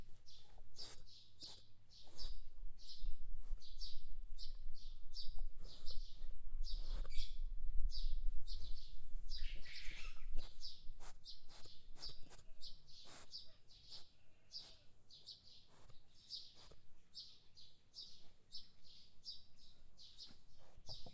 Sparrow Bowl
Sparrow, Bowl, Korea